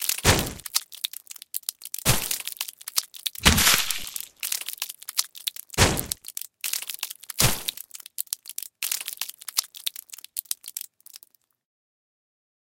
Gore Rain
A lot of fruit died to make this sound. Ever have a whale explode and need the sound of flesh falling from the sky? Maybe you had an adventurer who was turned into mince meat above your heads. Regardless of the fact, you never thought you would need to search this on the internet but here you are. Enjoy!